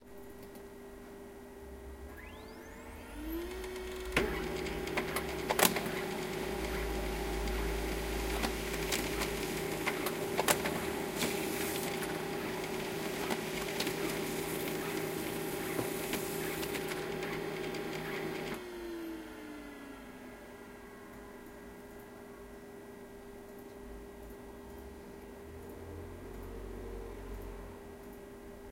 samsung laser printer success
samsung laser printer printing one page without clogging
office, machines, field-recording, printer, environmental-sounds-research, laser-printer